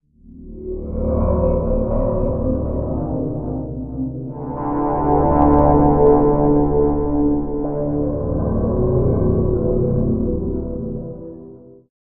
Layered pads for your sampler.Ambient, lounge, downbeat, electronica, chillout.Tempo aprox :90 bpm
ambient, chillout, downbeat, electronica, layered, lounge, pad, sampler, synth, texture